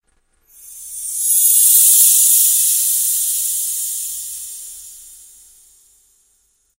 Final angelic sound
A homemade mix from a windchime superimposed on itself with reverb added.
mystic, angelic, spell, magic, chime, windchime, fairy